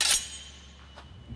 Sword Slice 13
Thirteenth recording of sword in large enclosed space slicing through body or against another metal weapon.
sword, slash, sword-slash, movie, slice, foley